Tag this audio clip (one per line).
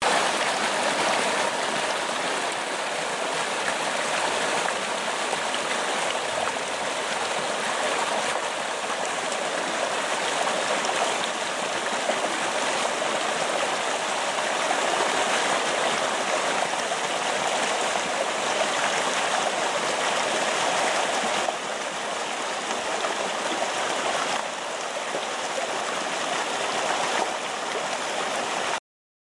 Pools
Waterfall